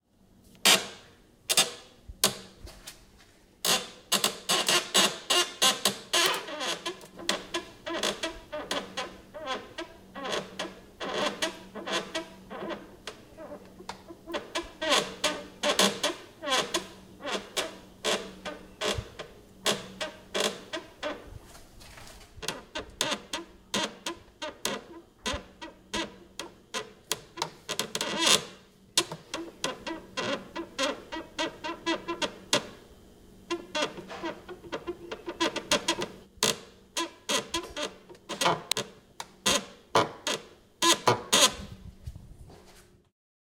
Meatle Chiar Sqeek 1
This is a squeak form a office chair.
Chair, Large, Office, Squeak